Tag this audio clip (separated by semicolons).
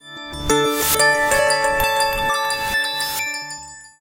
sound; startup; s-series; galaxy; Samsung